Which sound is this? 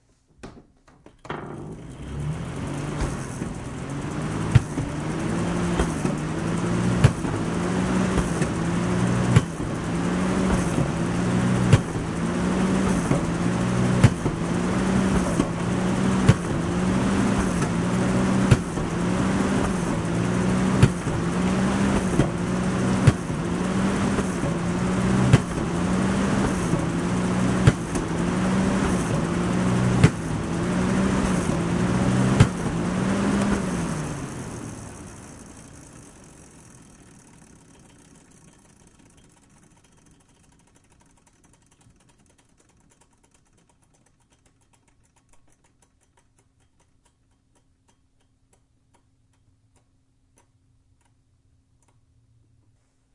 Exercise Machine 001
A simple stereo recording of a walking exercise machine producing a mechanical whoosing sound. Recorded using a sony stereo mic and mini-disk.
whoosh, machine, walking, Exercise, mechanical